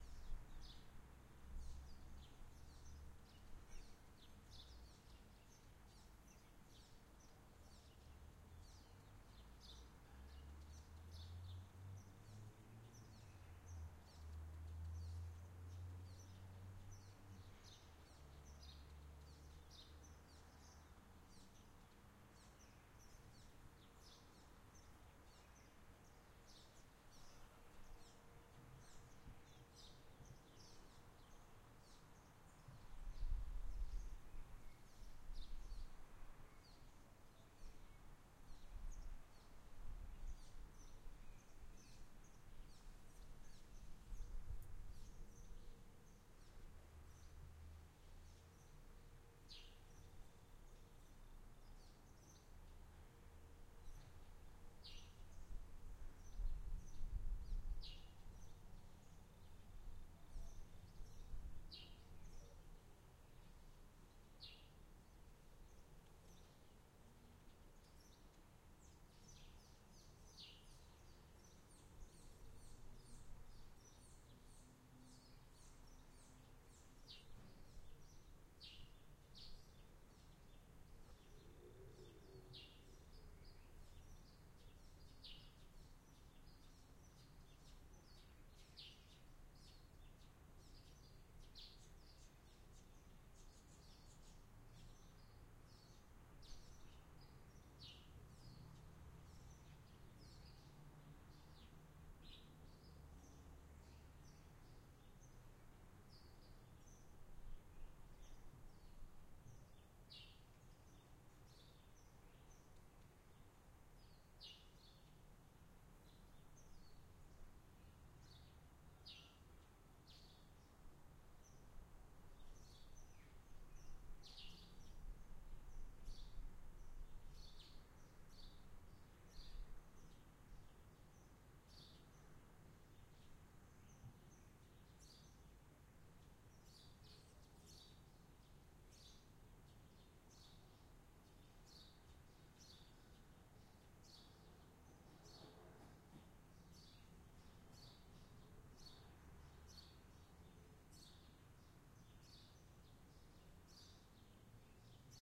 Summer Backyard Ambience
Ambience of a suburban backyard in summer, recorded using Zoom H6 with an XY capsule.
Ambience, Backyard